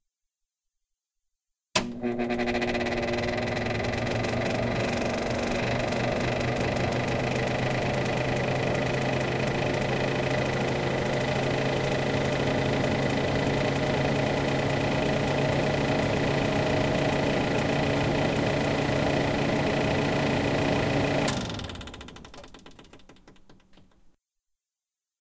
Stove Overhead Fan (Low)
Sound of a kitchen stove overhead fan on Low.
kitchen, overhead